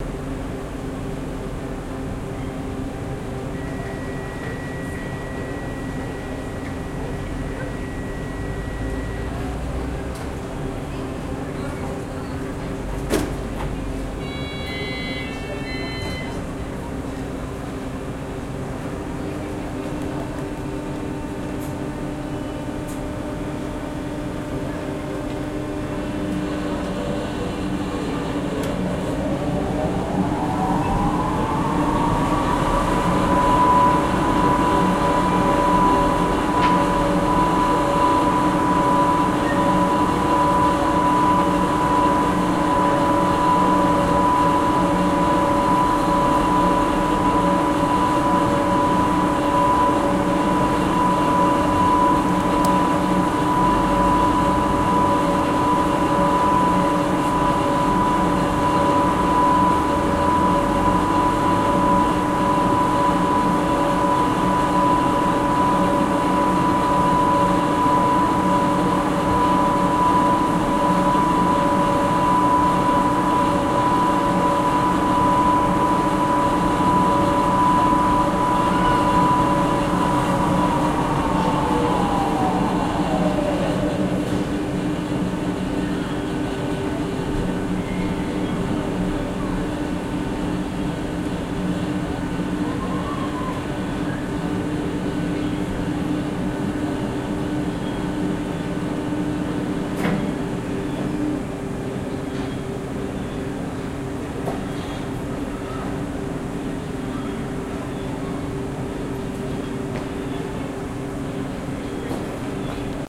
Hoist machinery on Montmartre funicular, Pars, France
This is a recording of the hoist machinery for the funicular on the Montmartre Butte in Paris, France. It was recorded a few meters away from the west-side hoist, outside the funicular, and covers one complete trip of the funicular, which lasts for about a minute.
You can hear the warning buzzer for the closing of the doors from 00:03 to 00:09, then the doors themselves closing at 00:13 (they were on the opposite side of the car shaft in relation to the mic).
Recorded on March 16, 2012.
Montmartre, funicular, inclined-railway, machinery, paris, ski-lift